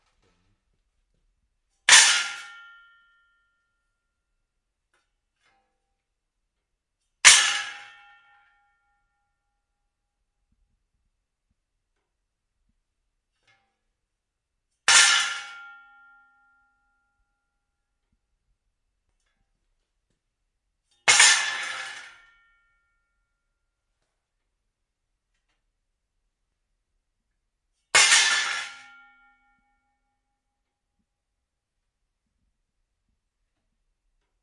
metal shard drop fall on floor
drop, fall, floor, metal, shard